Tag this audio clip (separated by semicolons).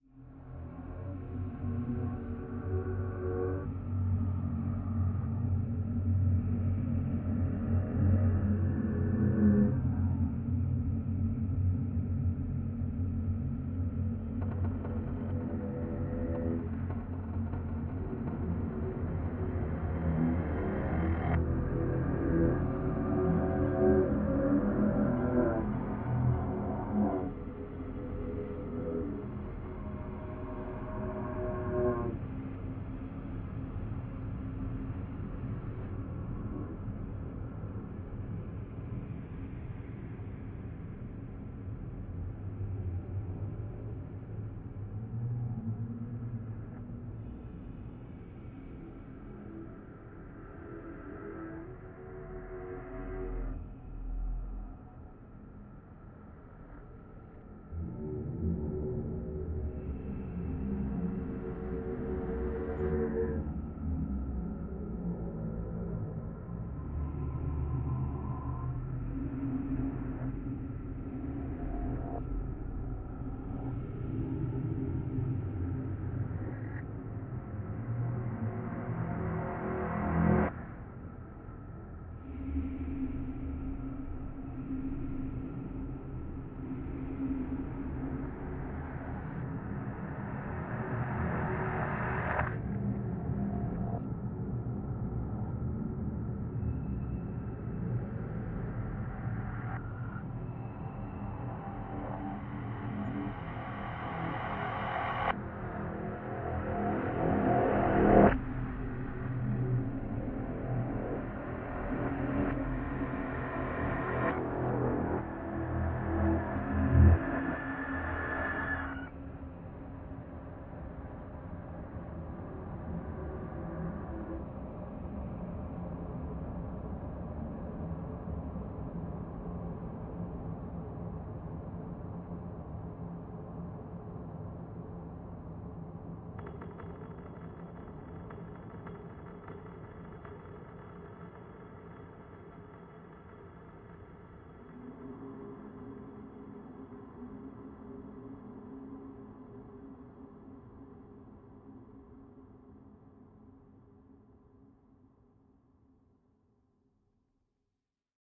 danger; energy; Hazard; secret; synth